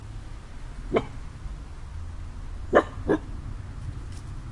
A barking dog in the woods. Recorded with an Edirol-cs15 mic plugged into an Edirol R09.
animal, nature, dog, field-recording, noise